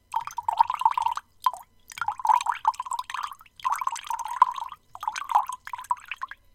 Water Pouring 2
The sound of filling a glass of water